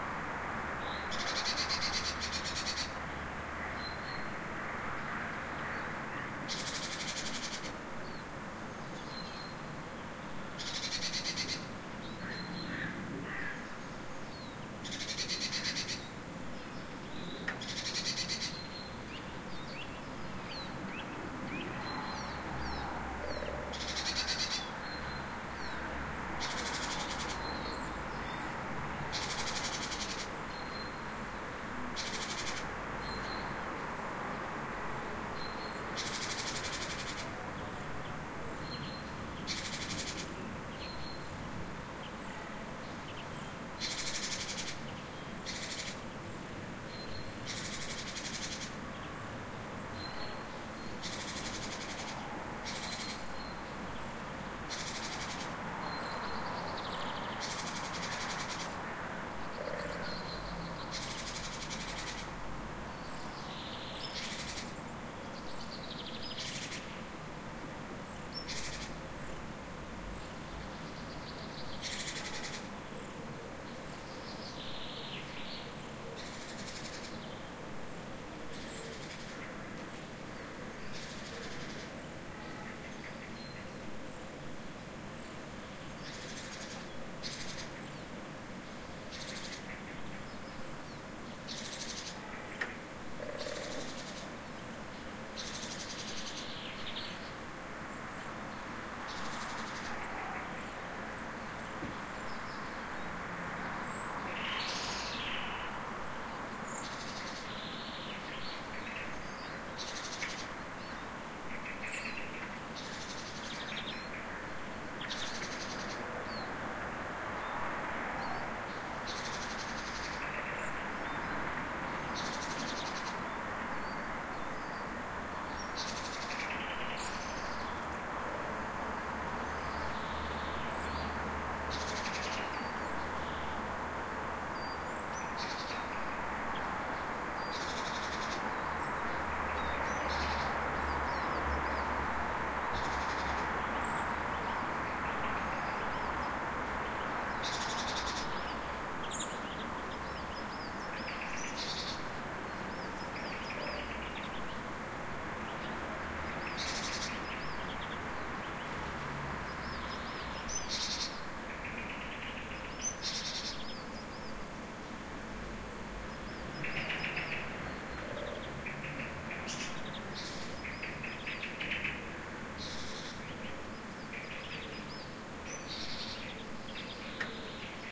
garden02 6 channel
Recorded with Zoom H2 at 7:30 am. Near street-noice with several birds
graz, 6channel, birds, garden, morning